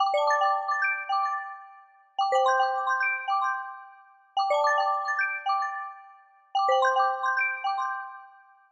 Original Phone Ringtone
It was created with the synths of logic pro x, for a series that I was designing sound for.
ringtone, cellphone, ring-tone